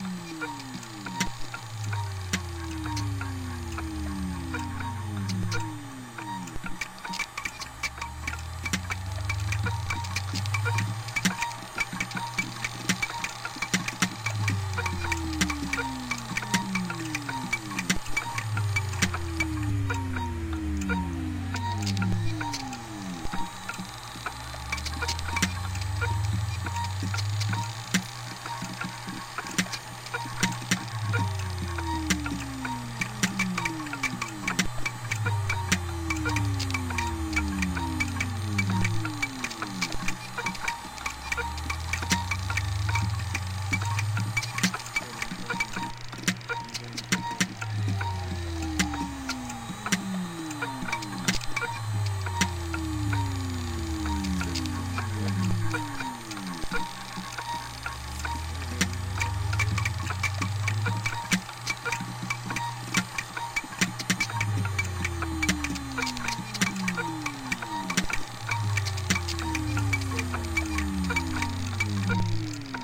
Failing Hard Drives (Glyphx) in Time cyclical
Failing Hard Drives
damage, decay, signal